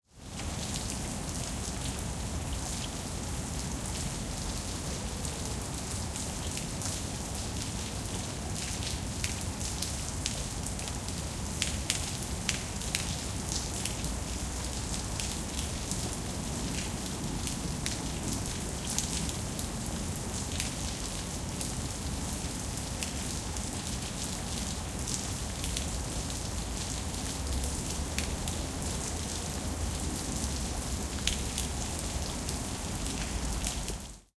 regen Straat2
Rainy street ambiance recorded 26Th of may 2006. Soundelux SMK-H8K -> Sound Devices 722 recorder. No processing other then raising the gain a little bit.